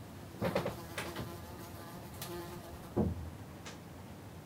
Accidental fly
A fly or a bee passes by a mic setup to record something else...
bee, buzzing